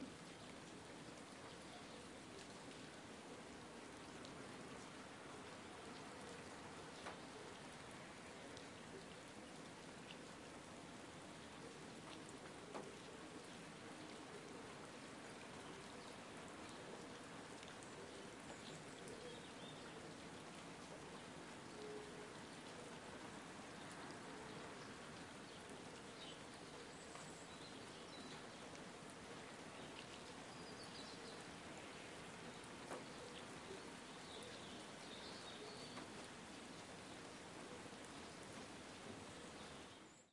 pajaros lluvia
rain & birds
h4n X/Y
rain, bird